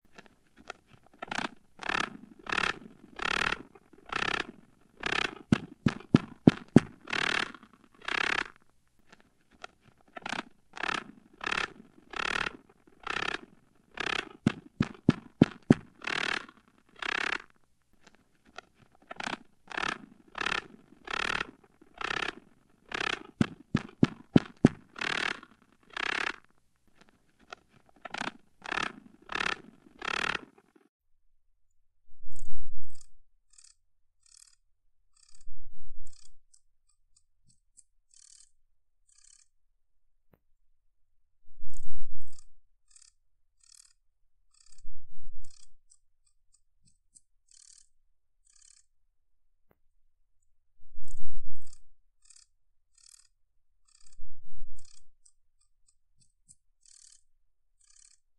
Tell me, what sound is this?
Spider Call
The purring mating song of a jumping spider. cleaned up as best I could
vocalization, monster, arachnid, horror, purr, creature, beast, spider, clicking